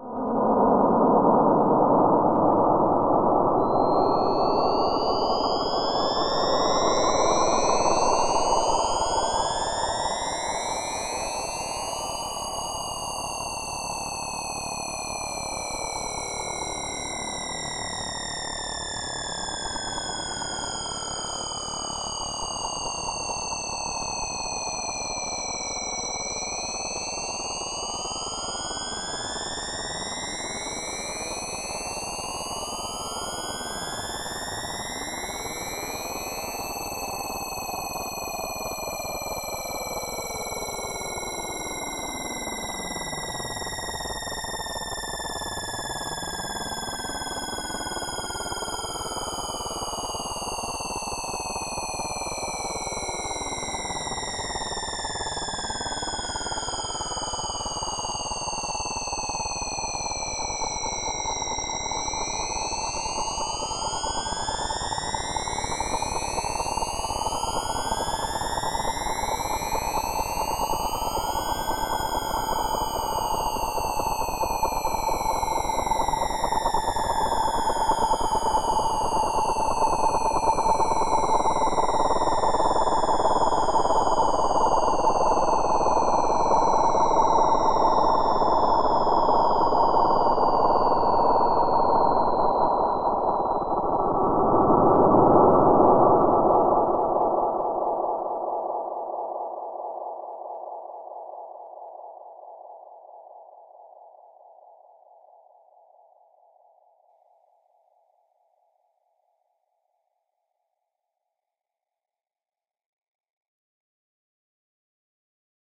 Lots of echo - lots of weird sounds. This is what space sounds like in the 1950s. More or less.
SoundForge8 - FM synth and assorted effects.